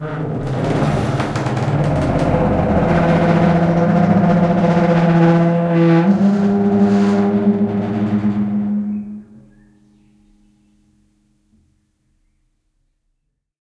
Hoellische Harmonics Part II
My "Falltuer Samplepack" deals with the mysterious sounds i recorded from the door to the loft of our appartement :O
The Recordings are made with a Tascam DR-05 in Stereo. I added a low- and hipass and some fadeouts to make the sounds more enjoyable but apart from that it's raw
dr-05, dynamic, ghosts, haunted, metall, scary, trapdoor